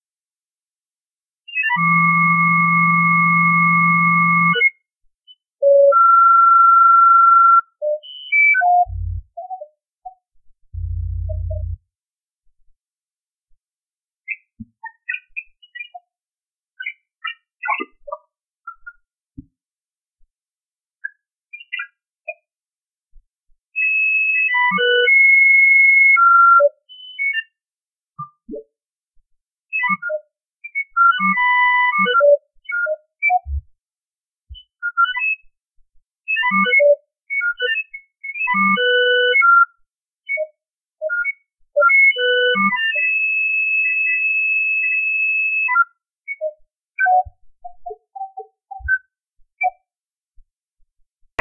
Processed this sound:
using the old noise removal algorithm from Audacity version 1.2.6 at pretty mild settings.
Undeniable alien communication :)